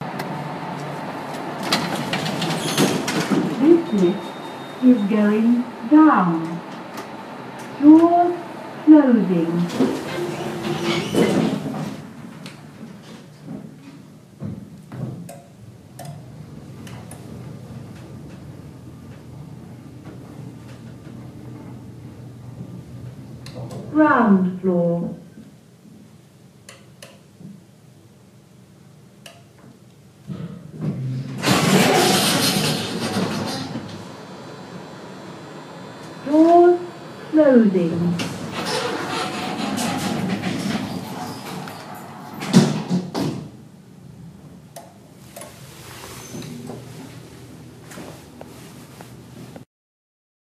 This Lift Is Going Down, Doors Closing.
Recorded on iPhone 6 Plus.
down, elevator, field-recording, lift, night, supermarket, up